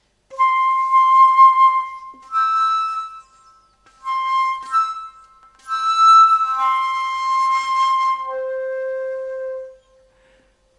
Flute Play C - 12
Recording of a Flute improvising with the note C
Acoustic, Flute, Instruments